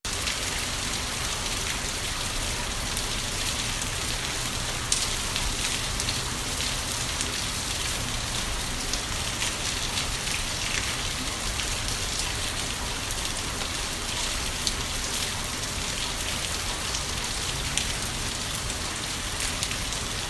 ~20 seconds of light rain